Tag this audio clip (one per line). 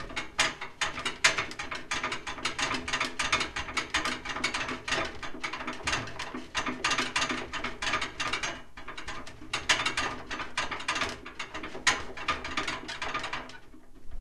pipe
rustle
squeek